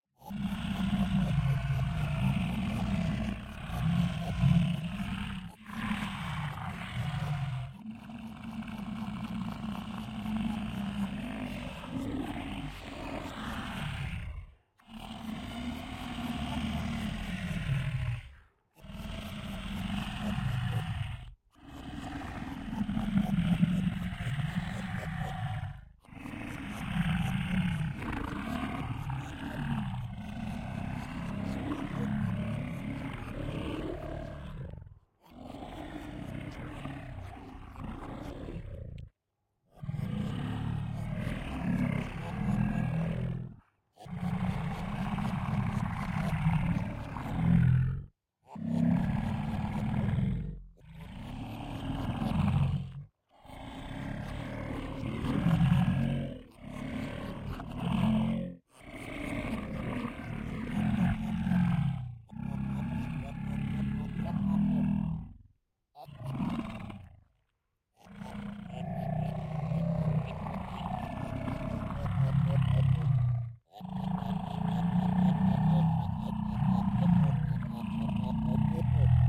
02 - reversed, pitch -24 (grain delay)
1st step of sound design in Ableton. Reversed the original and pitched it down with Ableton's grain delay.
low, sound-design, sounddesign, strange, weird